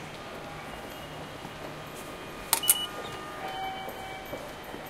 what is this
Japan Tokyo Train Station Check In Out Card 3
One of the many field-recordings I made in train stations, on the platforms, and in moving trains, around Tokyo and Chiba prefectures.
October 2016. Most were made during evening or night time. Please browse this pack to listen to more recordings.
announcement, announcements, arrival, beeps, depart, departing, departure, field-recording, footsteps, Japan, metro, platform, public-transport, rail, railway, railway-station, station, subway, Tokyo, train, train-ride, train-station, train-tracks, tram, transport, tube, underground